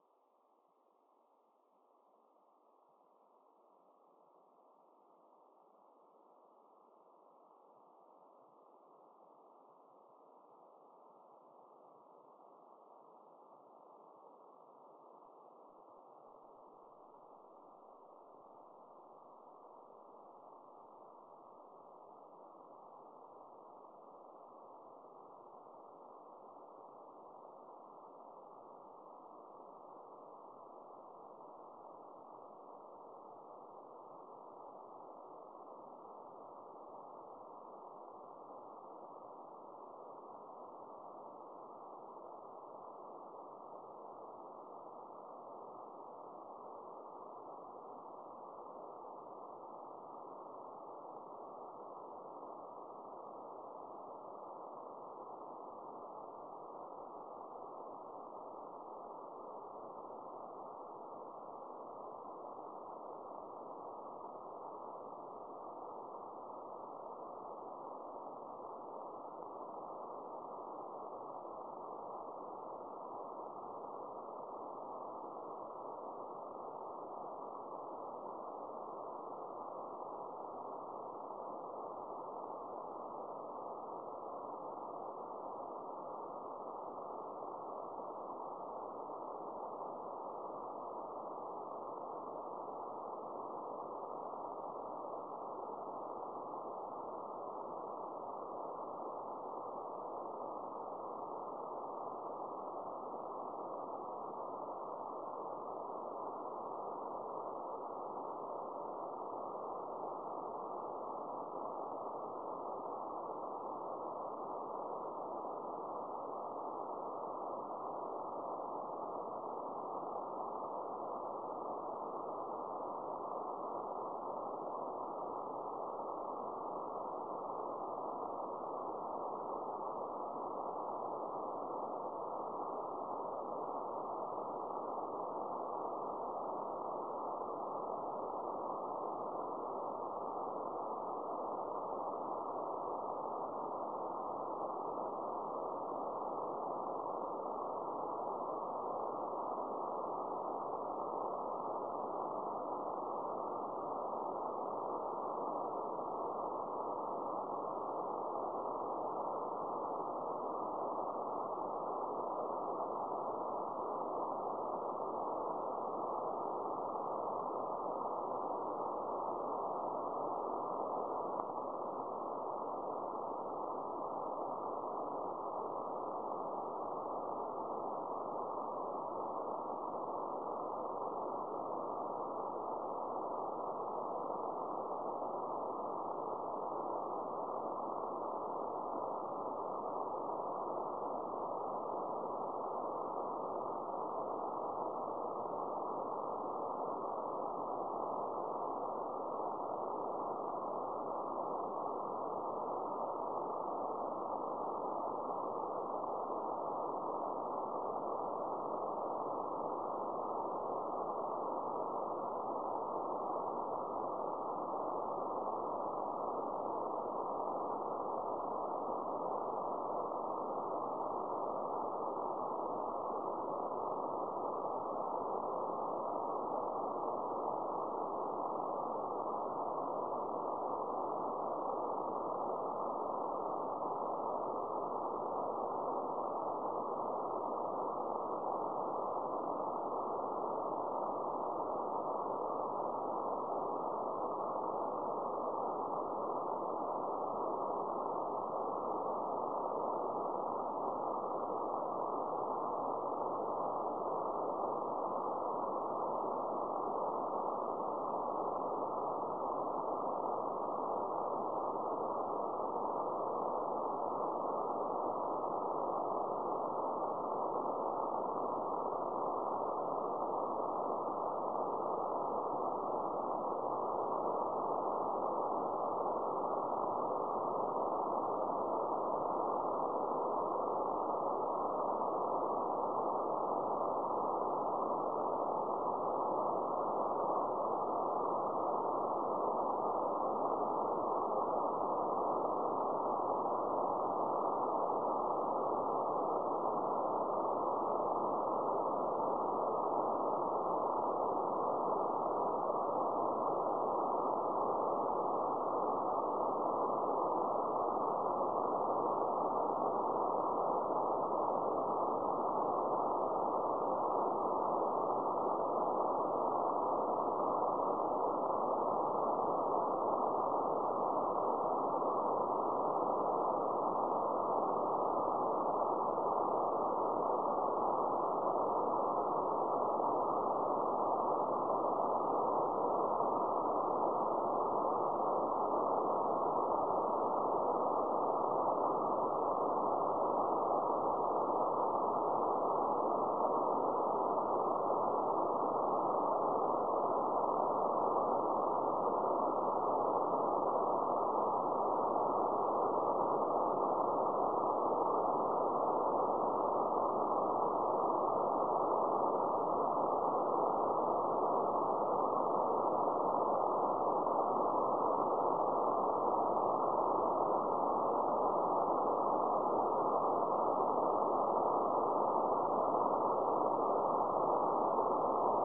SEEDS II (CO-66) 2011.08.05.22.30.07
ii, fountain, satellite, seeds